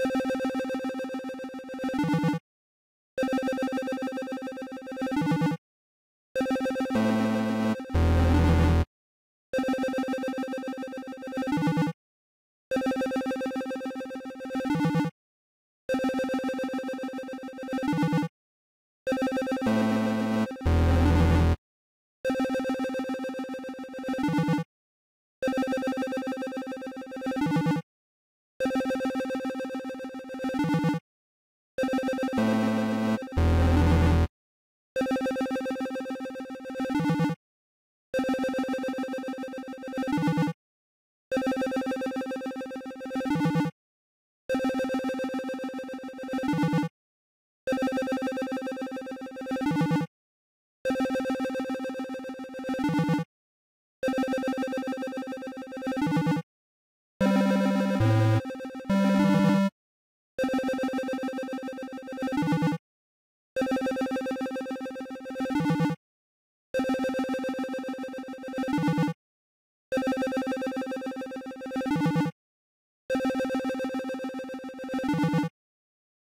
Reeses Puffs
This was made using BeepBox, a free website where u can make sounds! You should check it out.
01010011 01110000 01110101 01100100 01100100 01111001 01010000 01101111 01110100 01100001 01110100 01101111 0001010 0001010